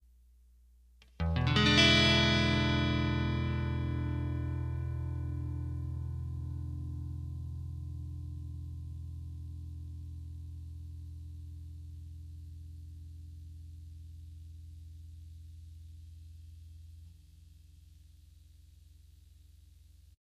Clean E7 Guitar Chord
An E7(dominant)chord, played on guitar.